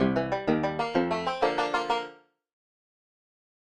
A short jingle that represents a successful action, end of level in a video game, or any other kind of job well done.
banjo, end, fanfare, finish, game, games, guitar, level, video, video-game, videogame, win, yay